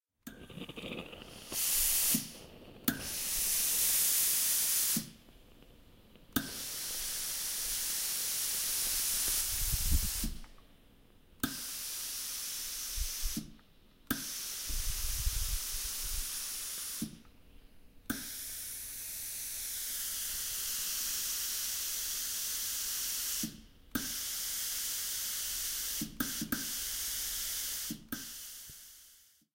Sound of steam